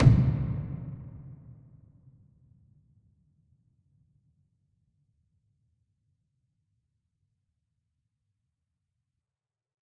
Frequency Impact 06
Synthesized using Adobe Audition
frequency, synthesis